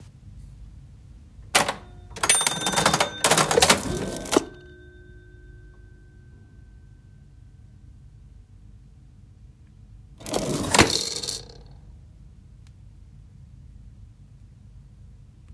An old manual cash register open and close, a coin rattle and whirr
would love to see where and how it's being used.